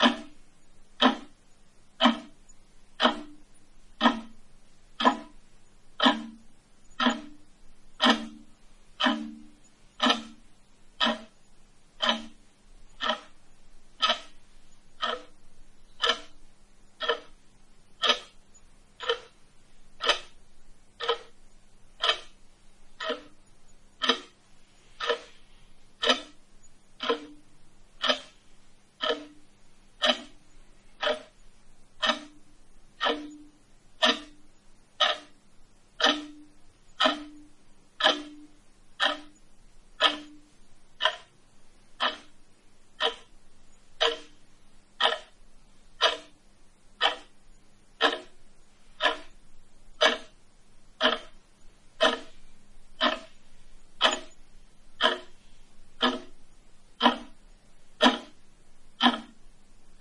A stereo recording of a big, wall mounted quartz clock.The sound changes slightly dependent on the position of the second hand. This clock is expelled from the room every time I record indoors. Rode NT4 > FEL battery pre-amp > Zoom H2 line in.